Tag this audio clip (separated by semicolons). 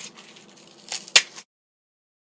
break; eskom; fail; match; nopower; shuffle